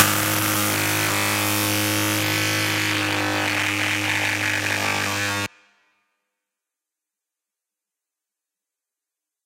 A machine vibrating. Recorded in a library, using a Mac's Built-in microphone.
machine, vibrate, vibrating, loud